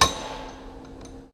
mono field recording made using a homemade mic
in a machine shop, sounds like filename--hammer hitting metal sheet
percussion, machine, field-recording, metallic